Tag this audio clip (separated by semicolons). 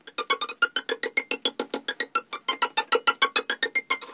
percussion; noise; fiddle; violin; strings; bowed